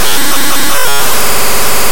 Raw Data 31
Various computer programs, images and dll/exe files opened as Raw Data in Audacity.
annoying, audacity, computer, data, electronic, glitch, noise, processed, raw, raw-data, sound-experiment, static